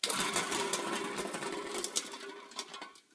56 recordings of various manipulations of an (empty) can of coke on a wooden floor. Recorded with a 5th-gen iPod touch. Edited with Audacity